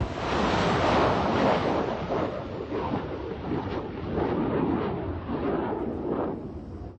mim-23 hawk missile launch 2
attack, rocket, missile, war, start, fight, launch, military, woosh, army, agression